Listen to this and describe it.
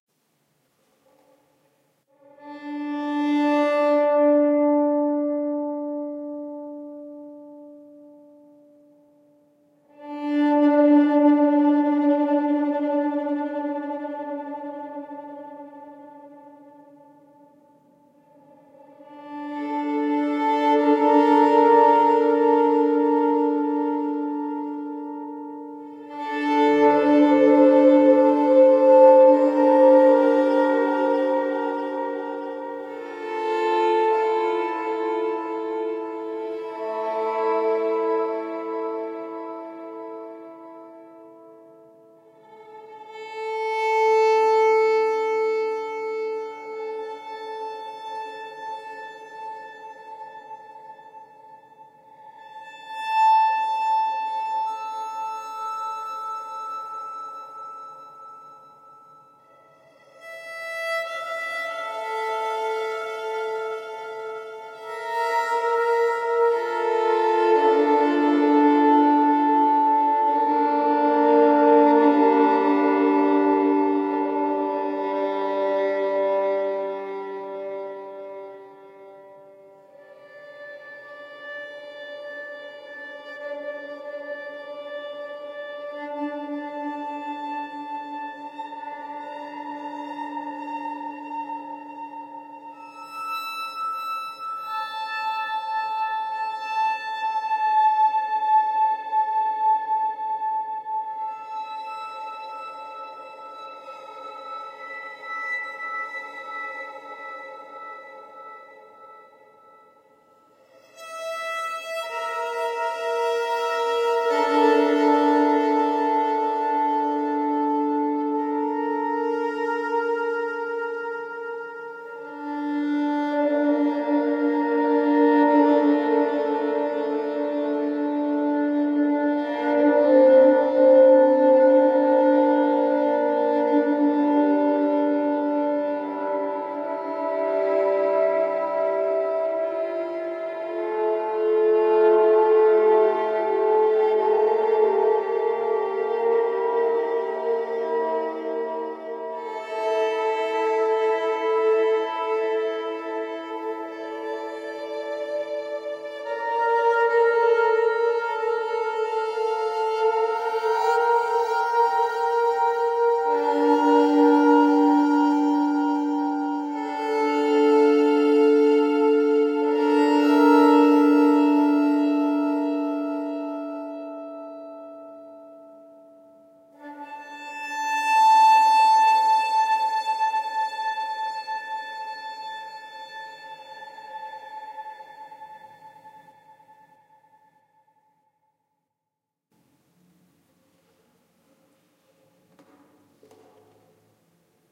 Violin After Effects
All I did is that I recorded and played a little riff on my violin with my iPad, and then add some reverb, and delay effects in Audacity. (Very Eerie-like, But Pretty)
This kind of reverb used in the beginning and end of the riff is a Cathedral Room-like sound, and a few seconds of delay.
I used mostly Double-stops during the riff.
Enjoy.
riff, Echo, Strings, Eerie, Loop, Recording, Horror, Delay, Violin-riff, Effect, Reverb, String-Instrument, Violin